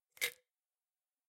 emptying a bottle of pills